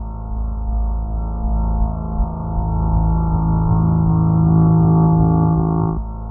ambient bass A note
experimental, ambient, divine, pad